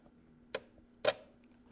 Jack out
Taking Jack connector outside from the PC